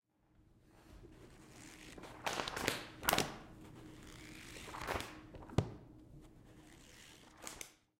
Opening Book
Me opening a large book.